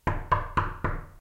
Tür klopfen 04
Door knocking
Recorder: Olympus Ls-5 and Ls-11
atmo, atmos, atmosphere, background-sound, door, knocking, knocks, t, terror